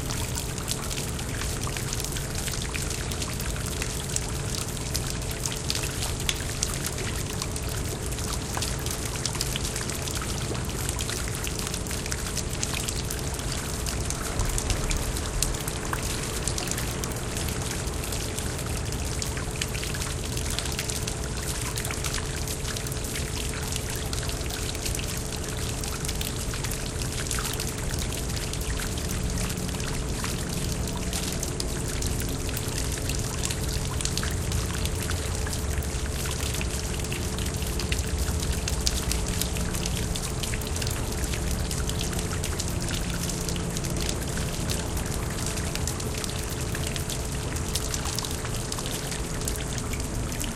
Sounds recorded while creating impulse responses with the DS-40. Water going down a drain in a parking garage in downtown West Palm Beach.